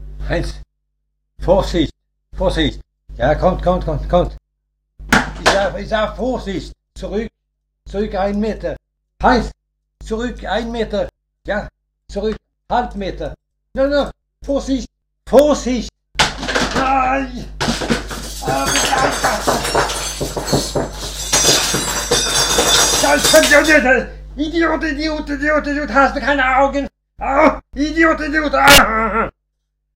Heinz, whoever he is, does his best to assist mounting something we don't see. But he lost control and everything colapps. The man who he helped goes mad and name poor Heinz all sorts.
smash, crash, bad, accident, swear, luck, angry